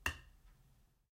oeuf.pose.metal 02
crack, biologic